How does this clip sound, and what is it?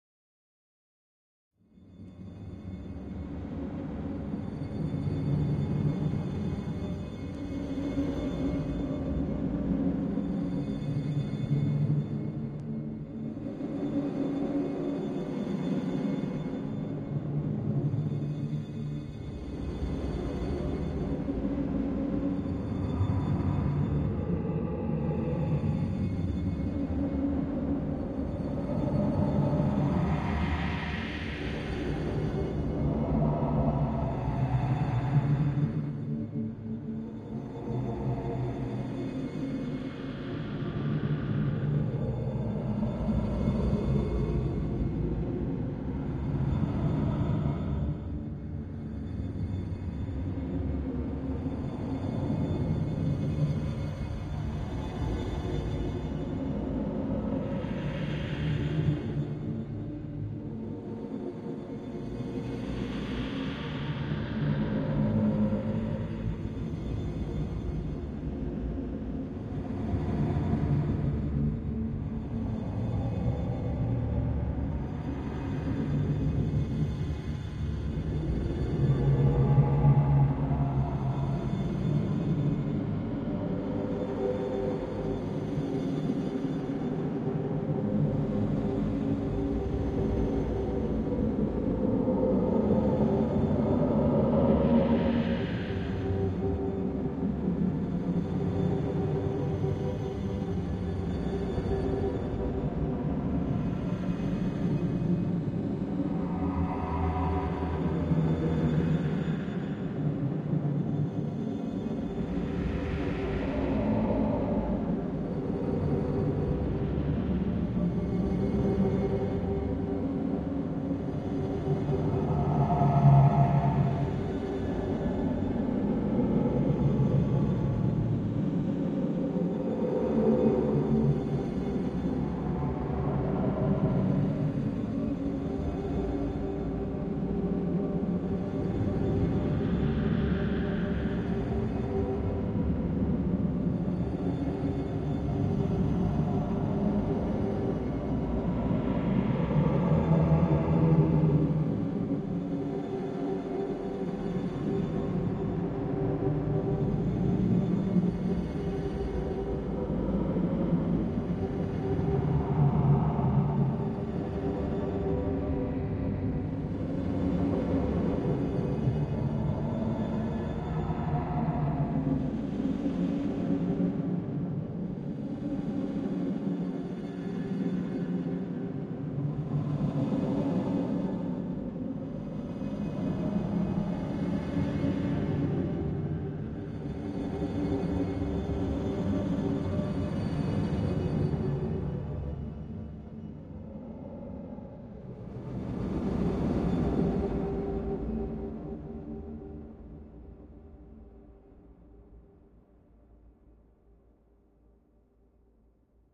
In the belly of the beast
A soundscape I created for fun that can best be described as sounding like standing inside some kind of giant living monster.
Created using a voice sample reversed and slowed down and a drum sample slowed down.